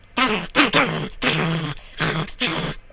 bunny - growl
I MUST state i do not agree with/participate in/or condone actual animal harm, the mod is dark humour and the samples reflect that, i hope the samples may be of use to others (i have no idea in what context they would be but hay who knows!)
this one is a bunny attempting to do a fear inspiring growl (awwww sounds so cute you almost forget bunnys are evil)
cute, growl, bunny, voice